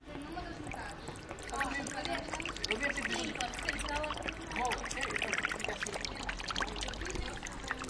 water in alameda
sound, map